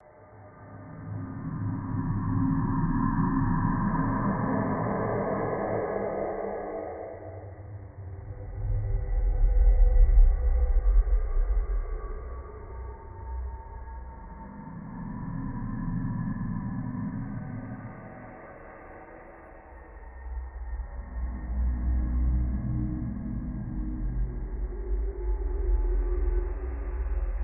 Eerie Atmosphere
The origin of this sound is actually from a sytrus dubstep growl that I denoised and absolutely stretched the crap out of. Really weird method, but it worked!
Amb, Ambiance, Ambience, Ambient, Atmosphere, Creepy, Eerie, Environment, Horror, Scary, Sci-Fi, Sound-Design, Soundtrack, Spooky, Strange